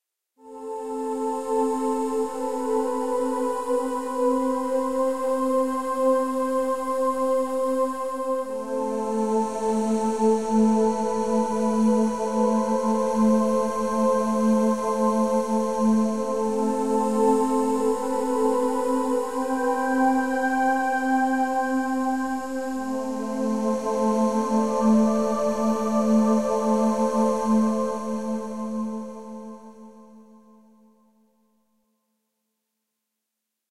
made with vst instruments
ambience, ambient, atmosphere, background, background-sound, cinematic, dark, deep, drama, dramatic, drone, film, hollywood, horror, mood, movie, music, pad, scary, sci-fi, soundscape, space, spooky, suspense, thiller, thrill, trailer